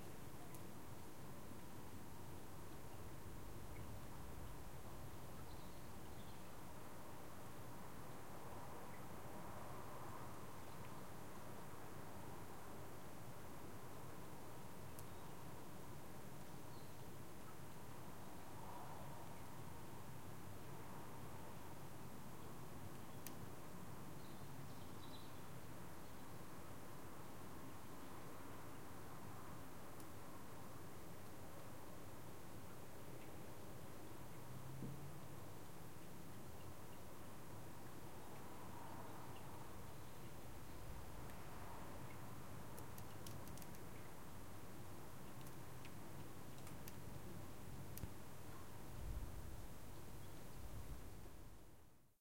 City Skyline Rooftops Noisy London
Rooftops, Skyline